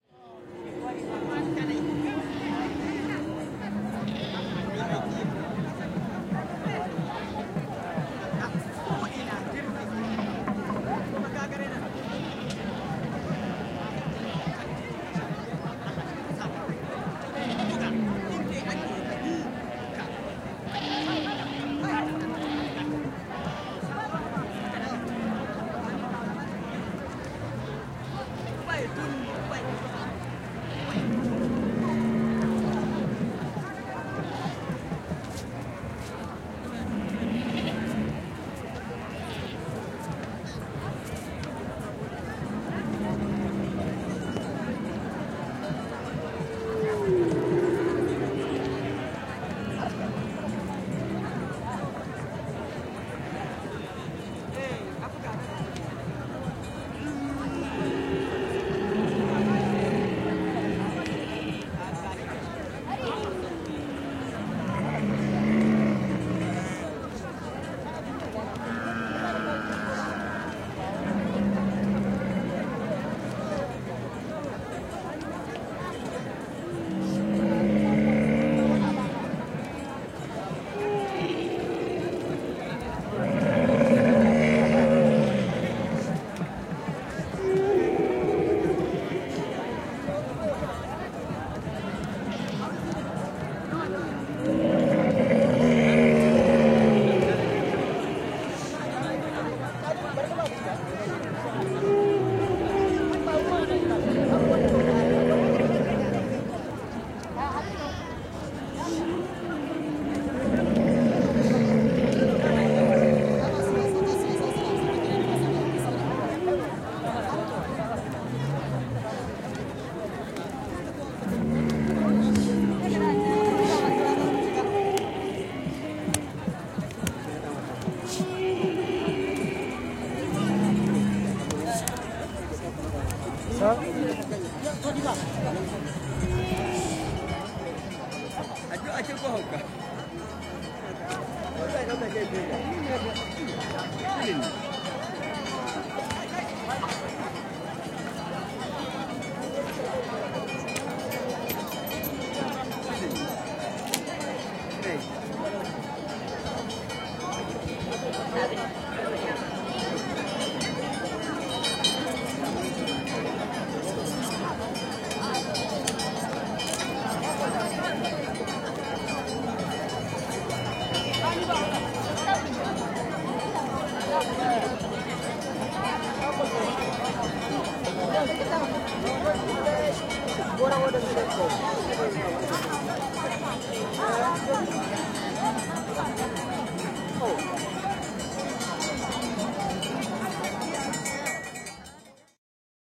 Niger, tori, kamelit / Niger, Tuareg market, people, camels, bells, buzz
Tuaregitori, ihmisiä, vilkasta sorinaa kauempana, kamelit mörisevät välillä lähelläkin, kellot kilisevät.
Paikka/Place: Niger/Kyapda
Aika/Date: 03.12.1989
People, Ihmiset, Finnish-Broadcasting-Company, Field-Rrecording, Animals, Yle, Africa, Afrikka